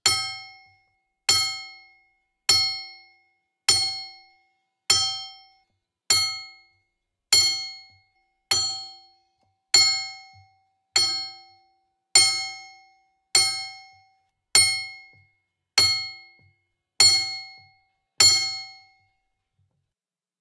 series of clinks or clanks made by hitting a crowbar with a hammer. Stands in for an anvil sound. Great for mixing in with drums / percussion.
anvil, clank, clink, hammer, percussion